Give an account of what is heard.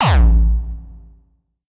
This kick is confused. It's somewhere between being a Nu Style Gabber kick to being a Nu Style Hardstyle kick. You can decide which it is. Made with a combination of custom source samples and LMMS.
thanks for listening to this sound, number 68265